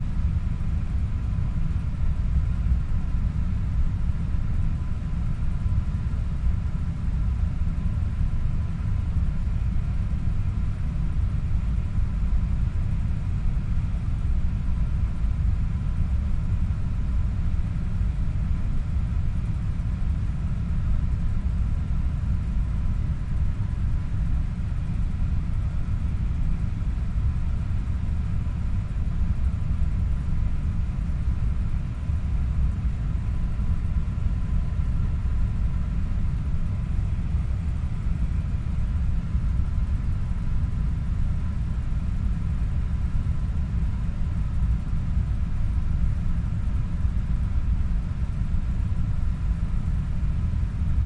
Air Conditioner intake
This is the sound of the air conditioner pickup in an office building.
The mic was located in a corner of the air pickup
Recorded with a Zoom H1, with an external microphone (the T bar microphone from a m-audio Microtrack II on a headphone extension) to make it easier to reach the vent.
Edited in Adobe Audition.
air-conditioning, ambience, industrial, rumble, ventilation, ventilator, zoom